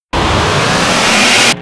Massive Machine Startup
machinery, sci-fi, machine, mechanical, robot, sound-effect